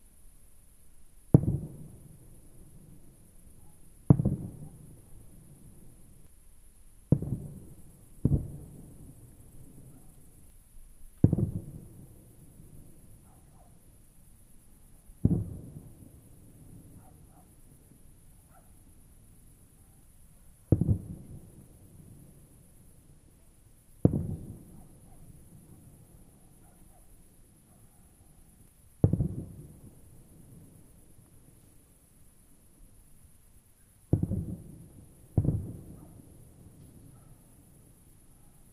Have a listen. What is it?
Some firework cracks in the distance in open field. A good basis for a sound track that gives an impression of a war or fighting in the background. Mix it with lower pitched versions and you get more variation in size of the weapons.